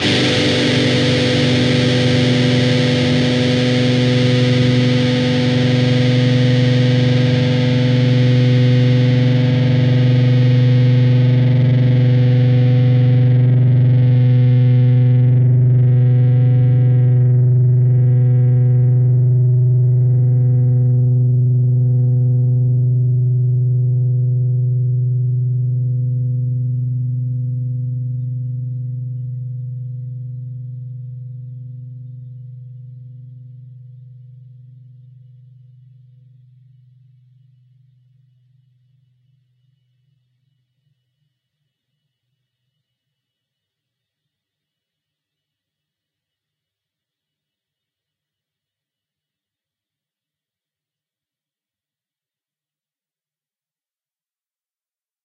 Dist Chr G up
E (6th) string 3rd fret, A (5th) string 2nd fret, and D (4th) string, open. Up strum.
chords, distorted, distorted-guitar, guitar, guitar-chords, rhythm, rhythm-guitar